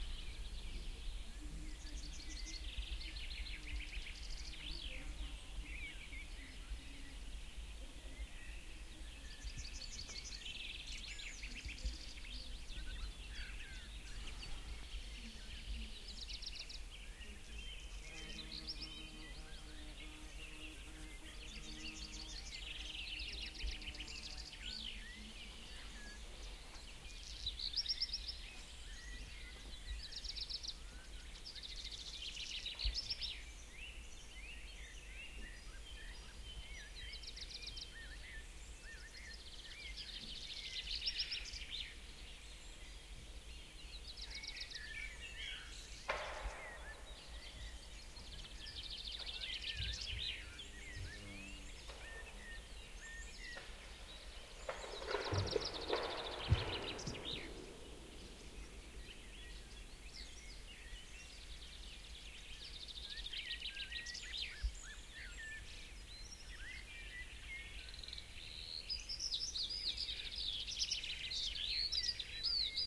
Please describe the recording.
birds forest morning
Bird songs and chirping recorded from a window of a challet.
Recorded with Zoom H4n through Rode stereo videomic pro.